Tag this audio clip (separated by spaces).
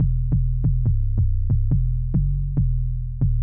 140-bpm loop bass synth fruityloops